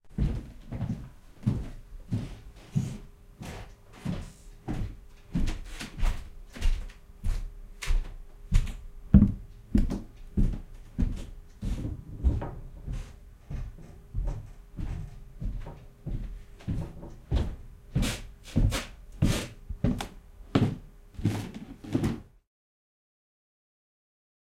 jf Footsteps
Footsteps on creaky, hardwood floor.
footsteps, walking